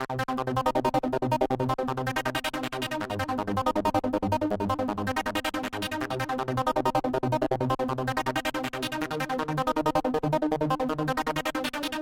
delayed trance riff